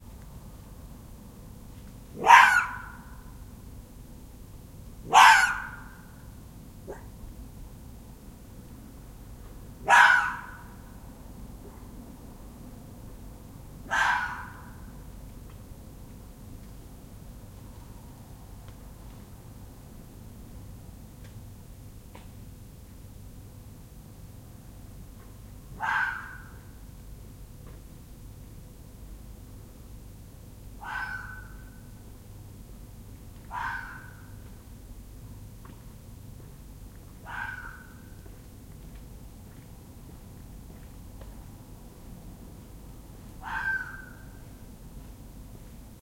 Fox Cry
Recorded this fox calling in the dead of night right outside the bedroom window! A rude awakening but good recording non the less
Fox, Urban-sounds, Fox-call, Fox-cry